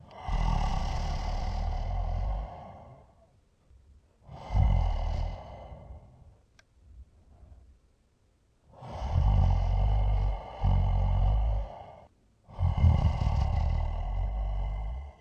Simple Tickling the Dragon or monstaer
moan, dragon, etc